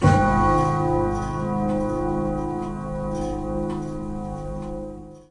This is a remix of grandfathers clock, but just a single stroke. I mixed the beginning and the end to get a longer decay. Good for dark 'time is running out' songs / effects.
chimes, dark, time